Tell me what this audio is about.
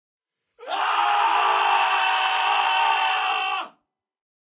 scream 2 men
2 men screaming.Recorded with 2 different microphones (sm 58 and behringer b1) via an MBox giving a typical stereo feel.